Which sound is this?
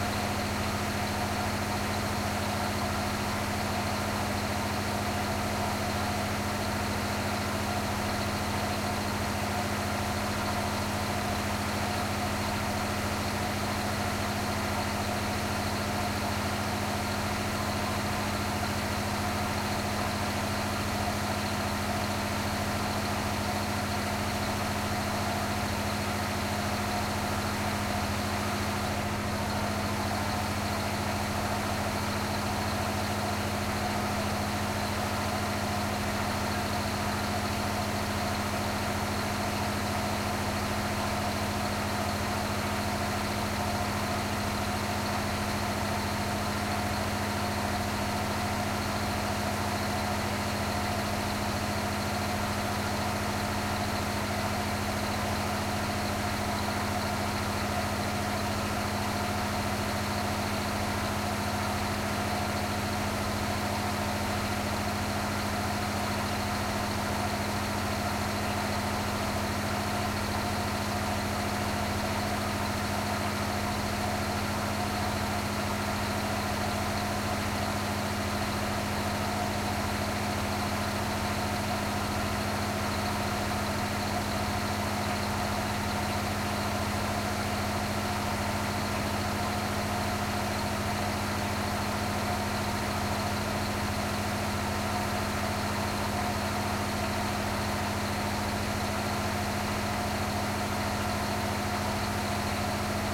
boat Amazon ferry 2-deck diesel barge onboard loud4 engine
boat Amazon ferry 2-deck diesel barge onboard loud engine